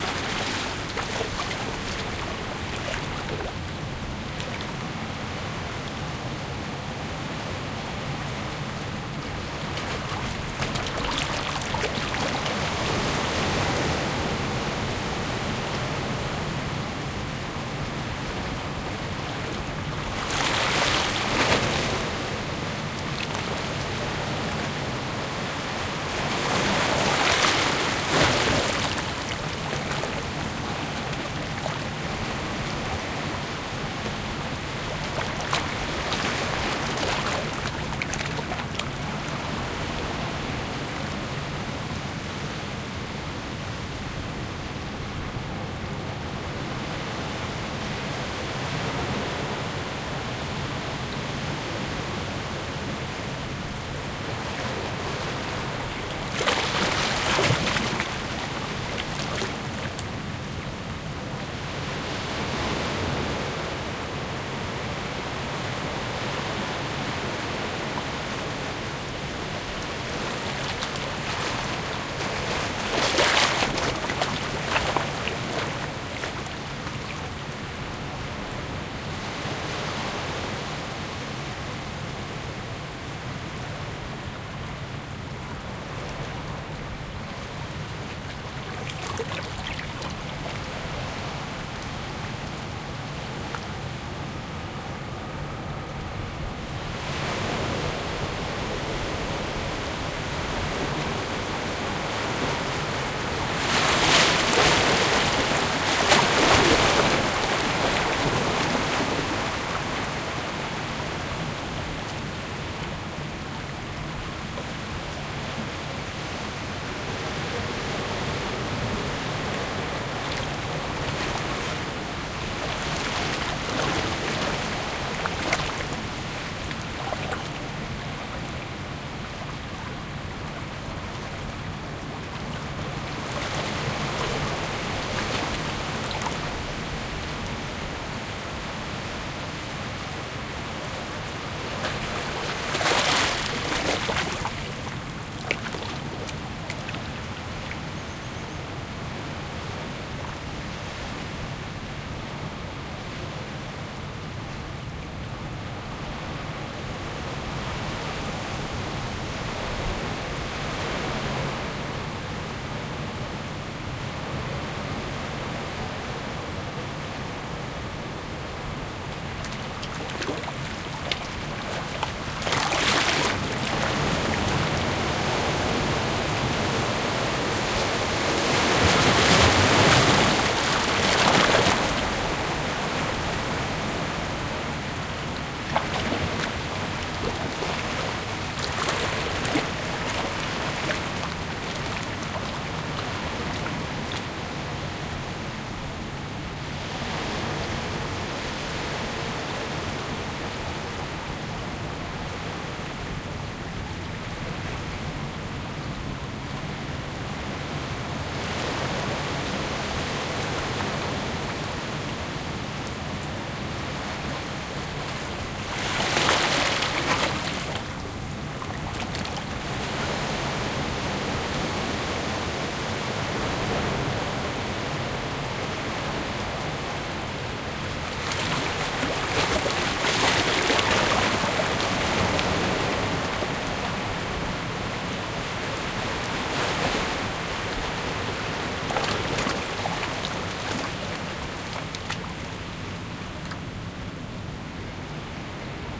Indian Ocean - closeup
Recorded at Kenya, Mombasa, Shanzu Beach on a windy day in July 2012. Sound of waves in a medium strength breeze, distinct gentle water splashing and occasional bird voices. XY stereo recording with mics placed on the coral rocks.
rumble, seaside, breeze, sea, field-recording, wind, beach, coast, shore, water, ocean, wave, waves